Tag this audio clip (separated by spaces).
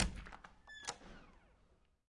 door open squeak squeaky wood wooden